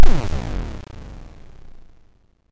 Generated KLSTRBAS 8
Generated with KLSTRBAS in Audacity.